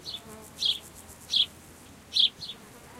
Sparrows and Bees
Sparrows chirping and bees buzzing. Recorded with Zoom H4N and edited in Adobe Audition.
bees, chirps, sparrows